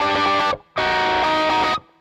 guit. dist.
another loop with my guitar this time with distorsion. Edited with Logic.
distorsion, guitar, loop